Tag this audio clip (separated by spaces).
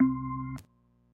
c4 note organ